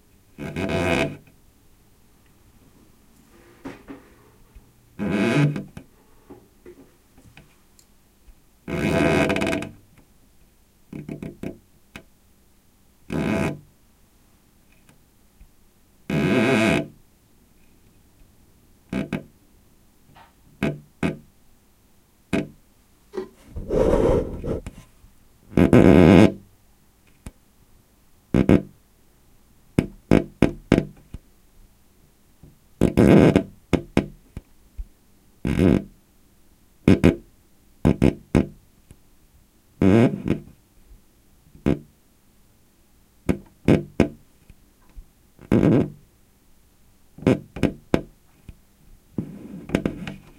Recorded with my Sony MZ-N707 MD and Sony ECM-MS907 Mic. I created these sounds by rubbing my dry finger across some old painted wood, resulting in a sort of creaky wood type sound. The mic was also rather close to the sound source.
sfx, creak, wood